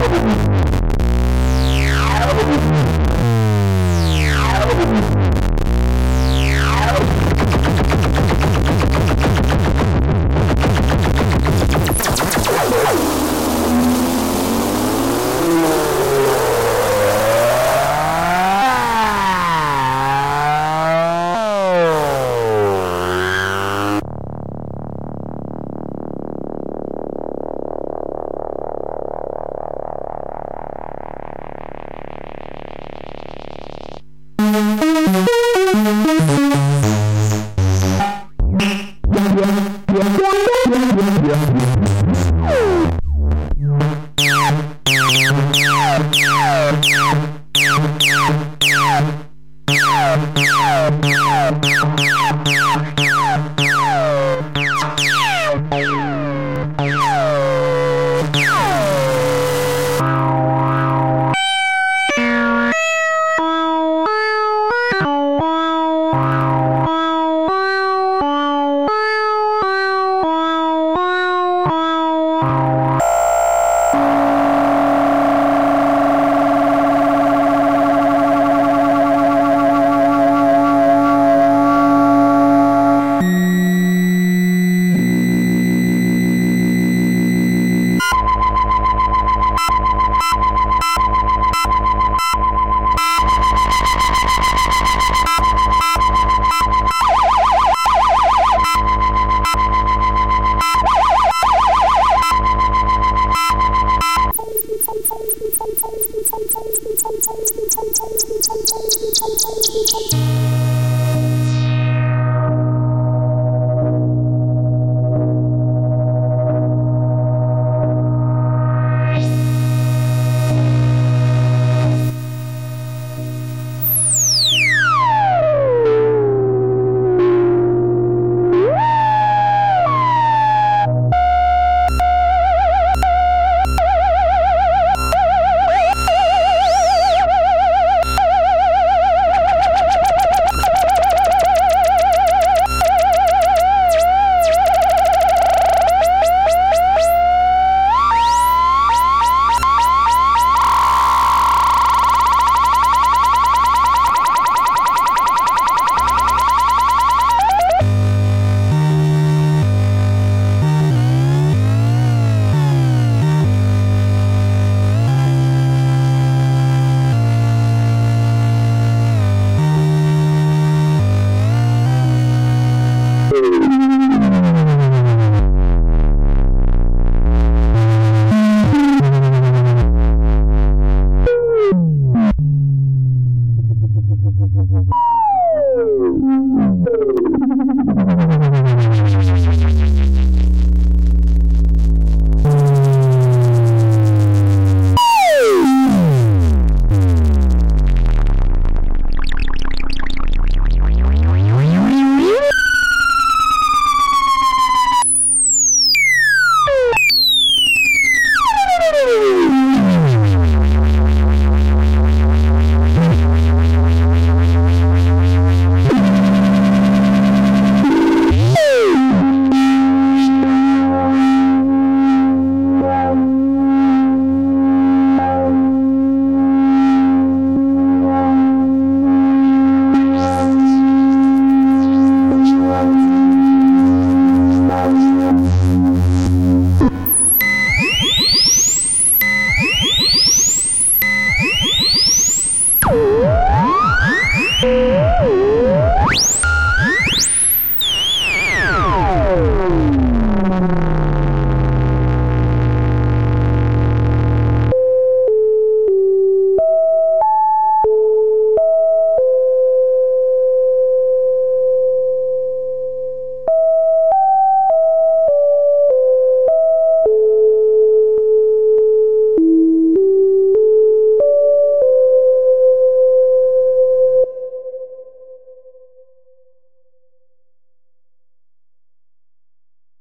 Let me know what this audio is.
annoying cwejman sounds
An edited collection of clips from a session on my Cwejman S1 modular analogue synth.
analogue, modular, cwejman, analog, lfo, synth, insane, pulsing, bass, crazy